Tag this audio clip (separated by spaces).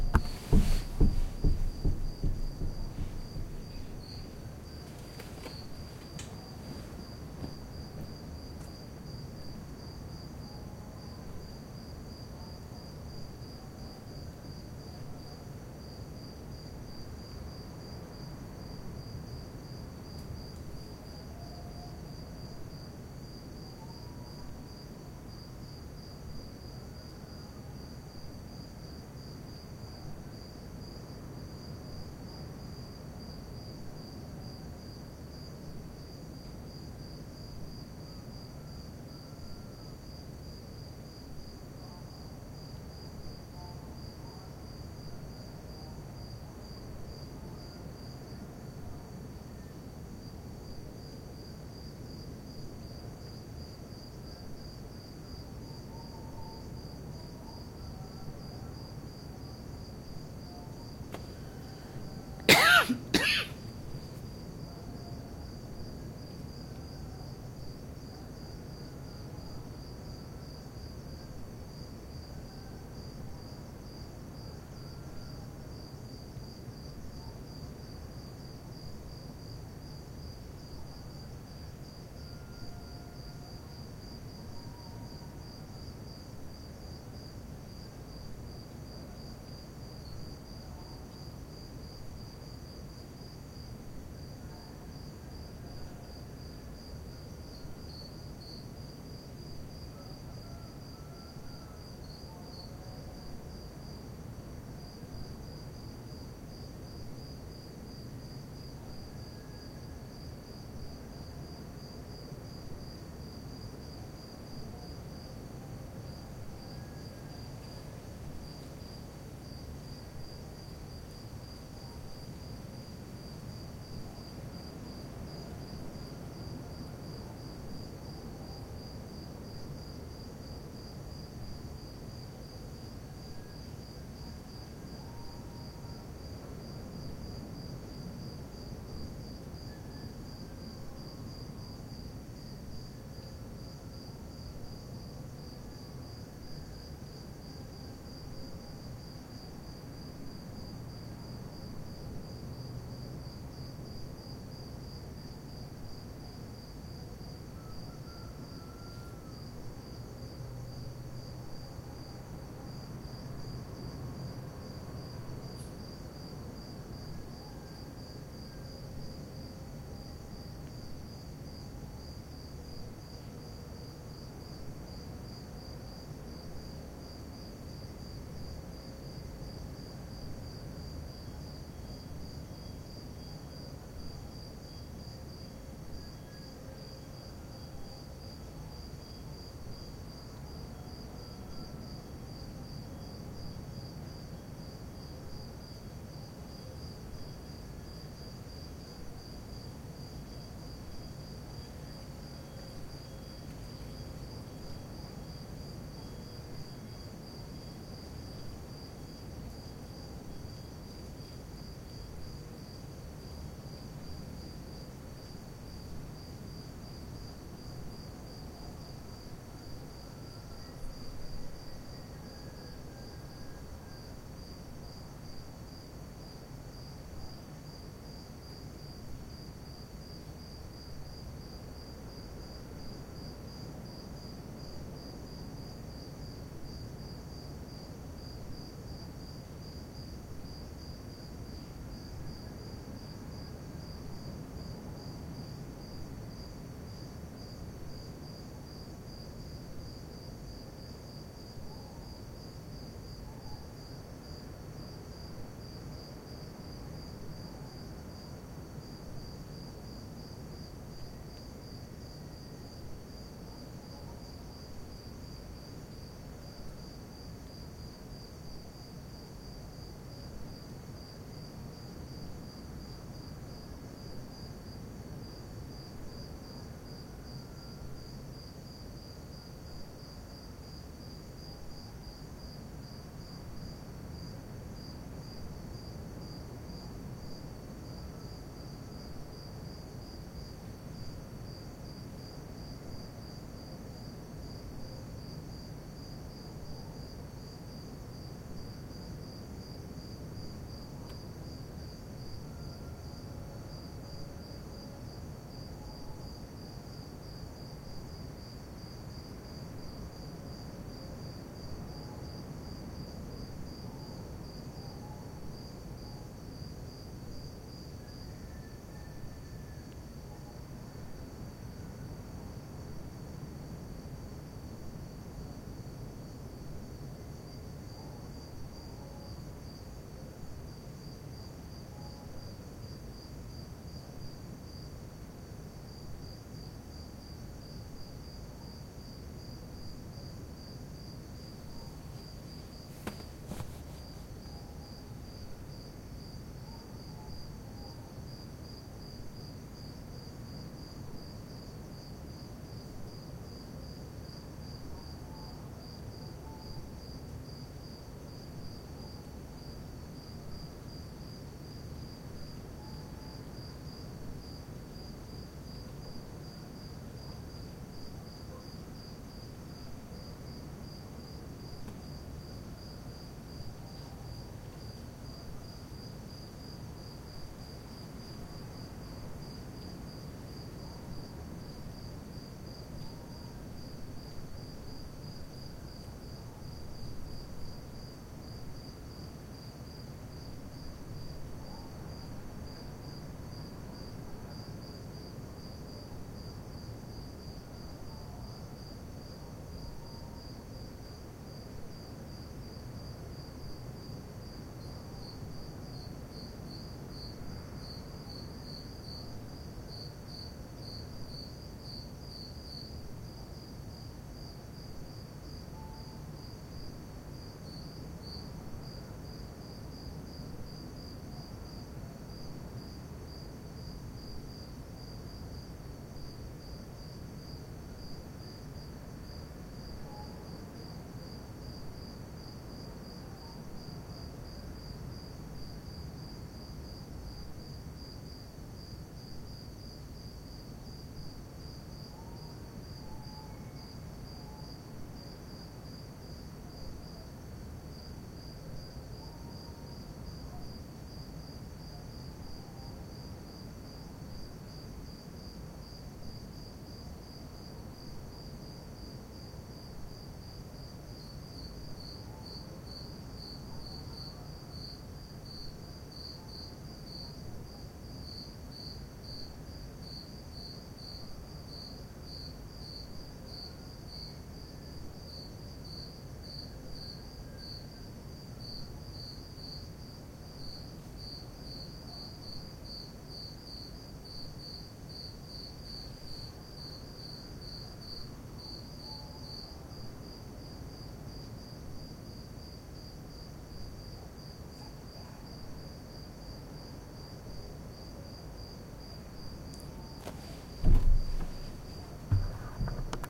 cicadas nature field-recording birds birdsong Afirca